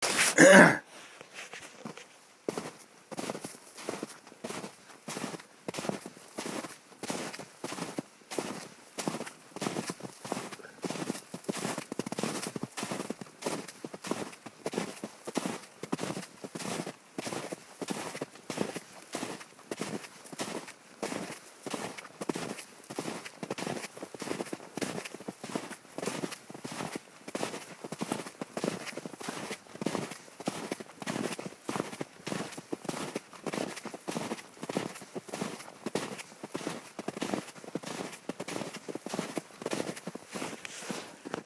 Quick walk in snow
Recorded during a walk in 10 cm deep snow +/-0 C
Wet, Snow, Footsteps